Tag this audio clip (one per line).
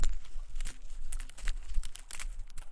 nature
rocks